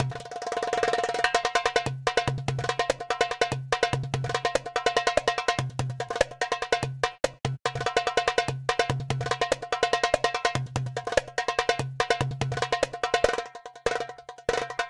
Arabic rythm
Arab, Rythm, Arabic